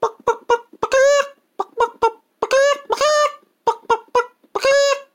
chicken clucking
Cartoonish chicken sounds.